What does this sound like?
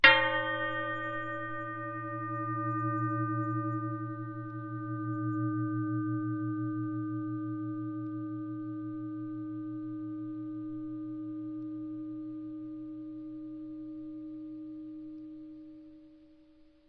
sound made striking a decorated metal plate, pitch processed. Rode NT4 > NZ10 MD
metal, ding, processed, pitch, flickr